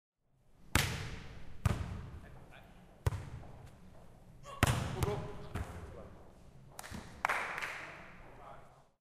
05beachvolley-hall
Inside recording of beachvolleyball, two teams training